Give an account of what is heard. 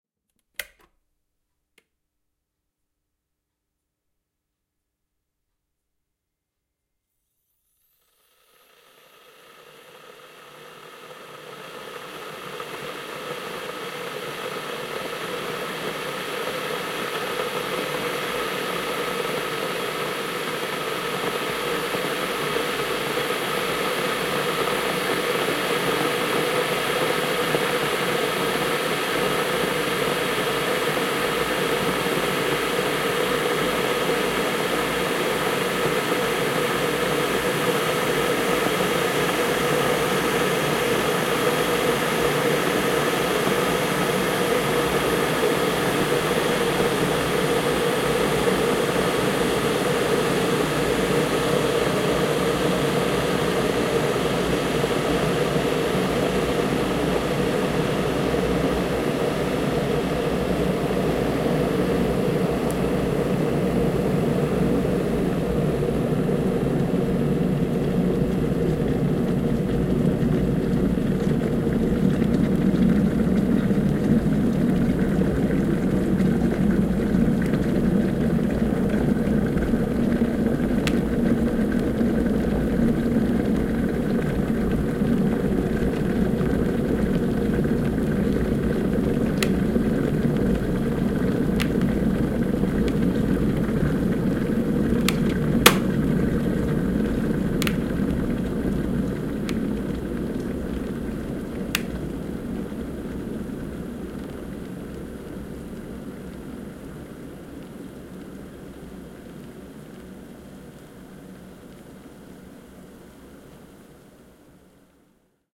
Son d’une bouilloire électrique. Son enregistré avec un ZOOM H4N.
Sound of a kettle. Sound recorded with a ZOOM H4N Pro.